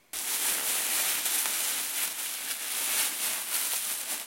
trash plastic bag